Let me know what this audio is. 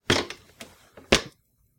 Footsteps-Step Ladder-Metal-06-Up
This is the sound of someone walking up on a metal step ladder.
step-ladder, Footstep, Walk, walkway, metal, Run, ladder, Step